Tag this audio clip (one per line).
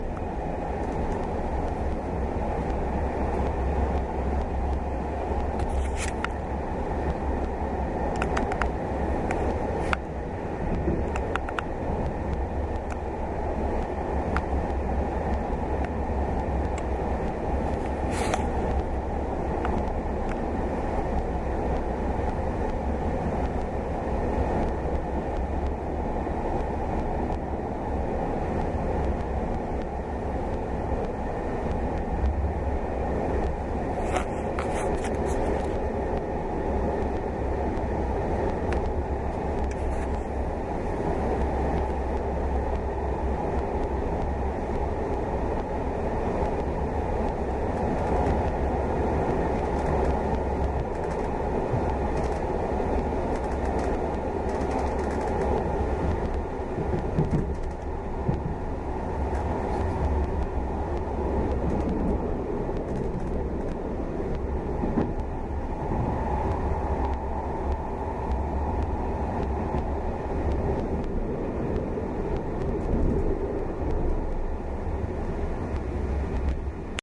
cityrings
mobi
sonicsnaps
belgium
soundscapes